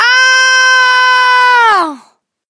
19-yeard-old Girl Shouting
woman, girl, pain, yell